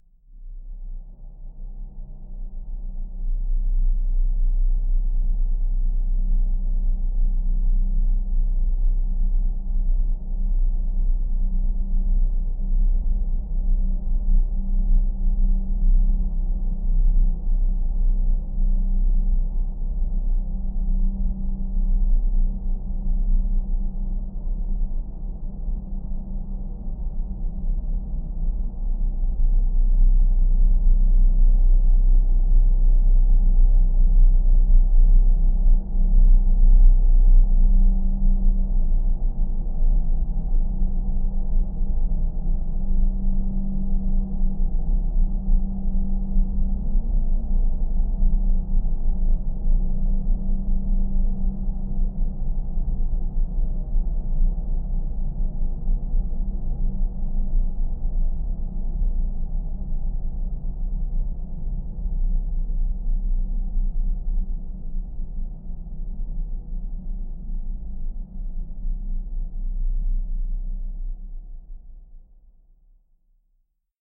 LAYERS 023 - Industrial drone-38
I took for this sound 4 different machine sounds: a wood milling machine, a heavy bulldozer sound, a drilling machine and some heavy beating sounds with a hammer. I convoluted the four sounds to create one single drone of over one minute long. I placed this sound within Kontakt 4 and used the time machine 2 mode to pitch the sound and there you have the Industrial drone layer sound. A mellow drone like soundscape... suitable as background noise. Created within Cubase 5.
background, drone, industrial, multisample, soundscape